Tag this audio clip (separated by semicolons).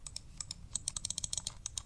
clicks; computer; click; clicking; mouse